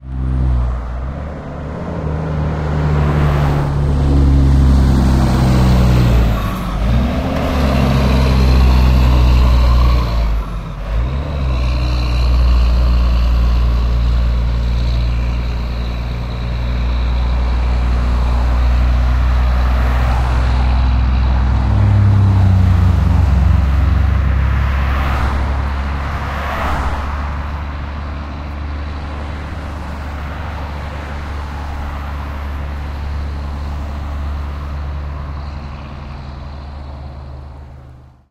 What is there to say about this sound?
CAR BYs truck large diesel going up hill right to left car bys during OMNI
Large diesel truck going up hill right to left
truck, car-by, large-diesel